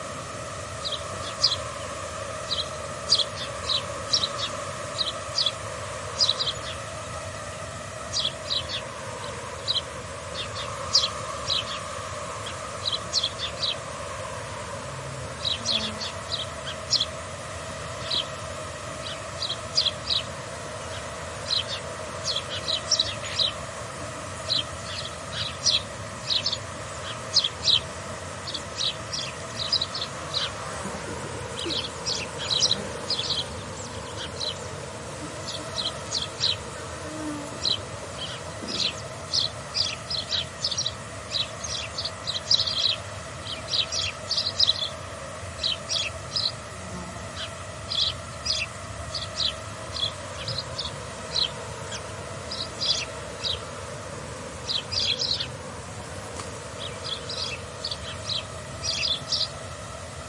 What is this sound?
20180401.marsh-013.mosquito
Marsh ambiance, dominated by buzzing of mosquito clouds and House Sparrow chirps. Clippy XLR EM172 Matched Stereo Pair (FEL Communications Ltd) into Sound Devices Mixpre-3. Recorded near Centro de Visitantes Jose Antonio Valverde (Doñana National Park, Spain)
spring, marshes, donana, mosquito, south-spain, insects, birds, buzzing, swarm, chirp, field-recording, nature, ambiance